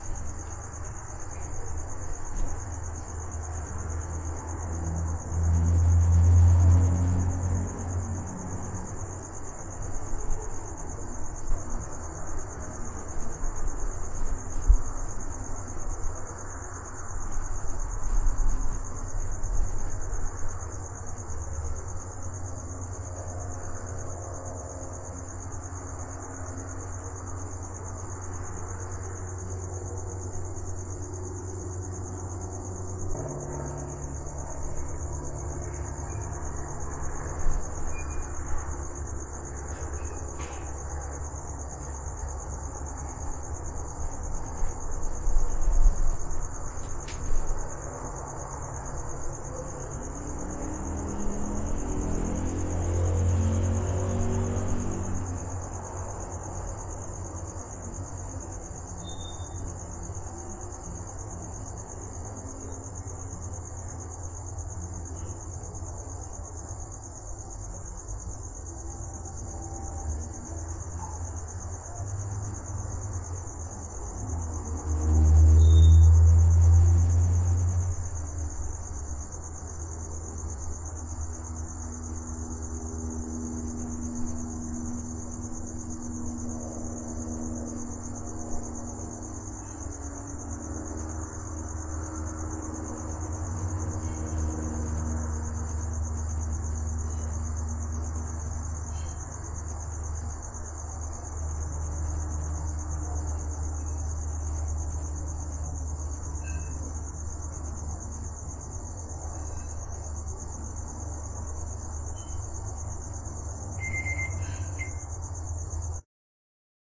Outside during the day ambience
Used for an outdoor Ambience noice.
general-noise, background-sound, background, ambience, atmosphere, soundscape